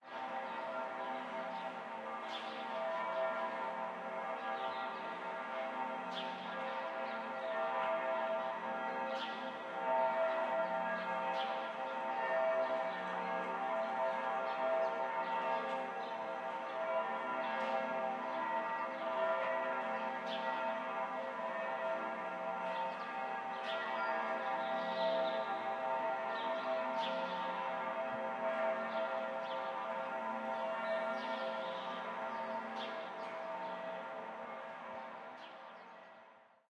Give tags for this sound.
birds; ambience; berlin; germany; prayers; ambient; ambiance; peaceful; atmos; field-recording; church; sunday; bells; atmosphere; city

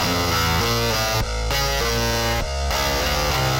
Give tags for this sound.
experimental; glitch; idm; extreme; drums; drumloops; electro; processed; breakbeat; rythms; hardcore; electronica; acid; sliced

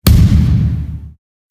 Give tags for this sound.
smack; concrete-wall; crack; slam; hit; kick; slap; pop; fist; thump; concrete; human; knuckle; concretewall; hand; hits

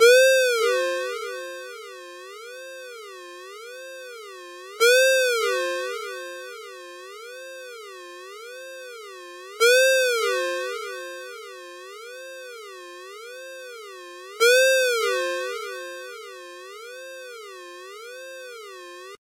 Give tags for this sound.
alarm; alert; alerts; cell; cell-phone; cellphone; mojo; mojomills; phone; ring; ring-tone; ringtone; sine

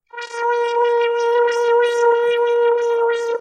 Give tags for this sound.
noise; sci-fi

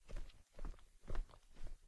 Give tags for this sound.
walk; walking; carpet; steps; footsteps; floor; shoes; tiles; foley

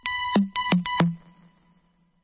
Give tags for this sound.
Sound; broadcasting; Fx

